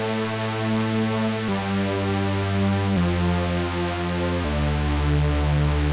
Backing Synth 1
Backing synth used in Anthem 2007 by my band WaveSounds.
162-bpm, distorted